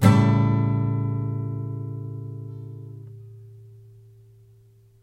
Yamaha acoustic through USB microphone to laptop. Chords strummed with a metal pick. File name indicates chord.
acoustic, strummed